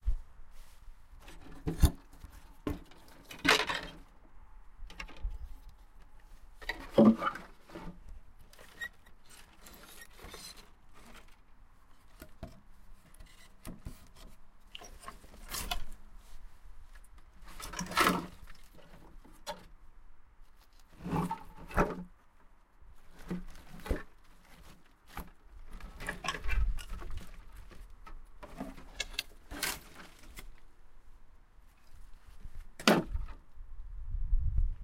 Rumbling Boards, Tools etc.
moving some tools and boards